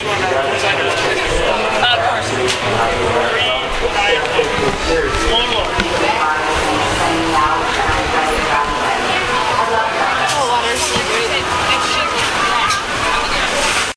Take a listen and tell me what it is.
Part1 of a beer transaction at Coney Island stadium.
baseball, beer, stadium, transaction